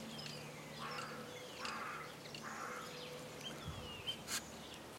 Took this off a video I was making. There seems to be a strange click just before each time the crow makes it's "caw" sound. Maybe that's what they always do but I've not noticed before.
field-recording, rural, Crow, garden, bird-song